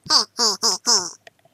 minion laugh 1
fun, Minion, funny, laugh, giggle, humor, laughter